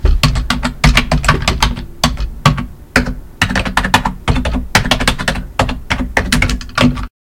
Keyboard Typing
Typing on a keyboard
typing, keyboard, type